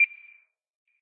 Short beep sound.
Nice for countdowns or clocks.
But it can be used in lots of cases.